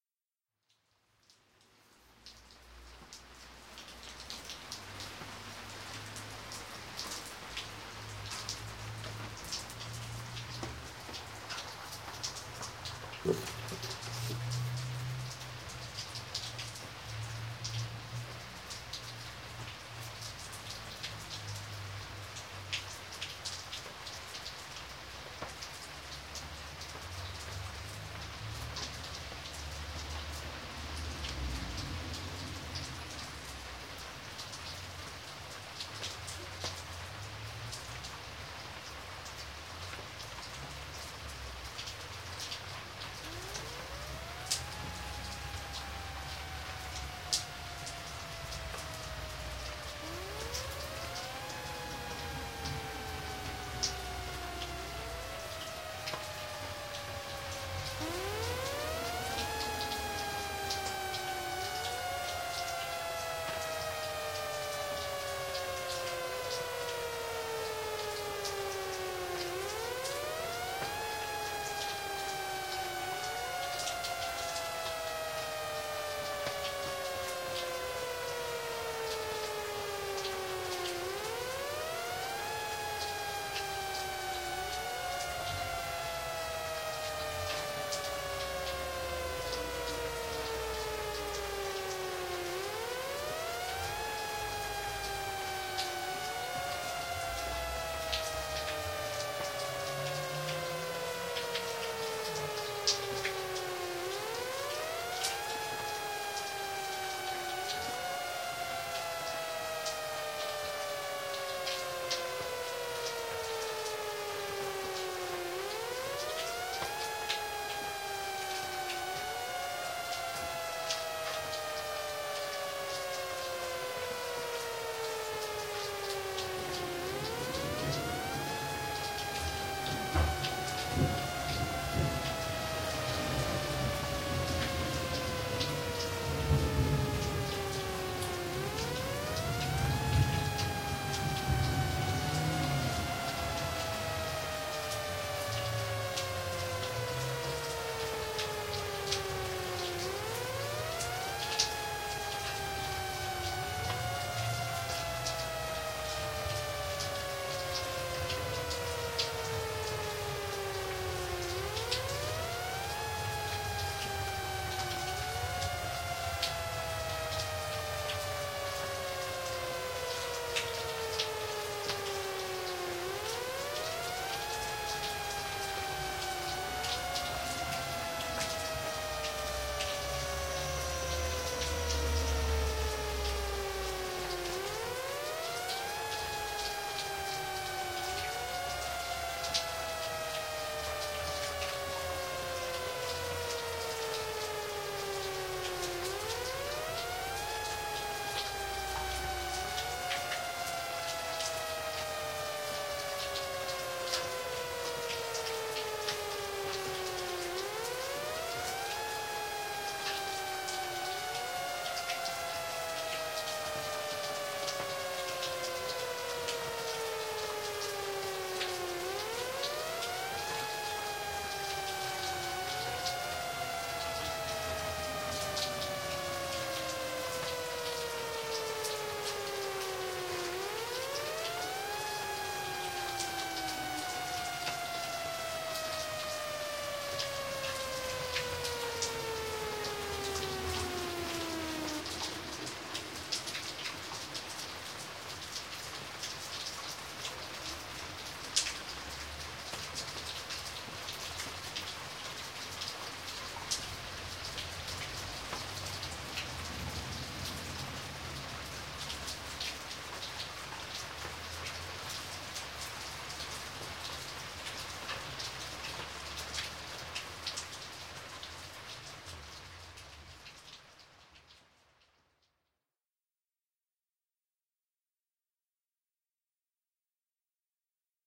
Simulated Outdoor Warning Siren Pt. 1: Severe Thunderstorm Warning.
In a rainy city, the sirens sound for a severe thunderstorm warning. The sirens are electronic, and sound in the Wail tone for 3 minutes to alert people to stay inside their homes. I then finished it up in FL Studio 20 by adding reverb and leveled the volumes and stuff. I hope you like this.
Outdoor-Warning-Siren, Rain, Wail